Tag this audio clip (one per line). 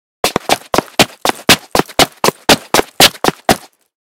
feet
foley
footstep
walking
shoe
foot
footsteps
step
walk
steps